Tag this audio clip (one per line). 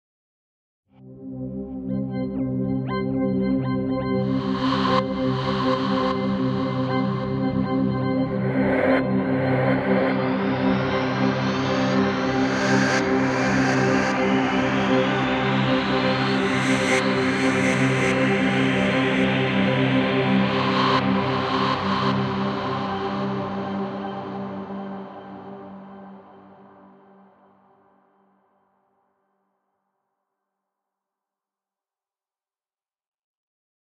intro
evolving
pad
pads
layered
arpeggiated
sweep